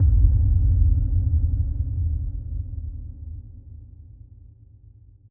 basscapes Boommshot
a small collection of short basscapes, loopable bass-drones, sub oneshots, deep atmospheres.. suitable in audio/visual compositions in search of deepness
strange, sub, backgroung, rumble, score, spooky, horror, soundesign, soundscape, boom, low, bass, electro, soob, deep, film, ambience, illbient, suspence, soundtrack, creepy, dark, experiment, pad, atmosphere, drone, ambient, weird